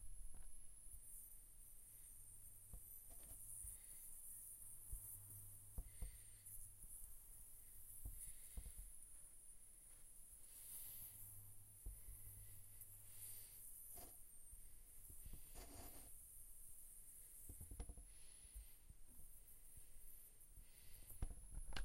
Electric (louder)
The sound of fingers on a plasma ball. Recorded on a Zoom H4n Pro (first attempts at home recordings).
buzz, buzzing, Electricity